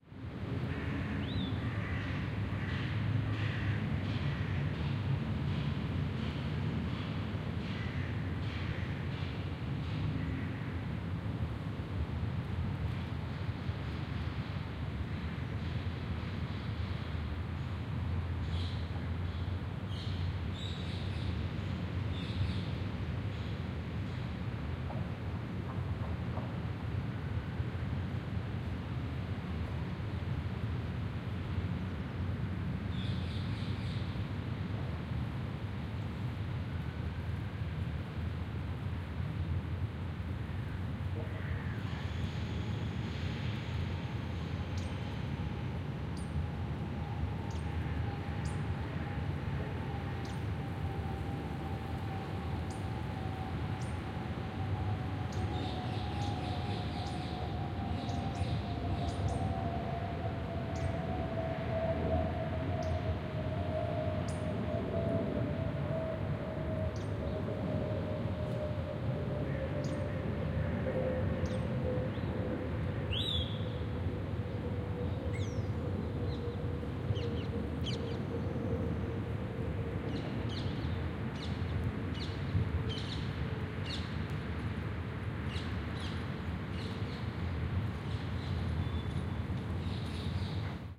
Binaural recording of ambience at London Fields, London E8 [Soundman OKM II Studio]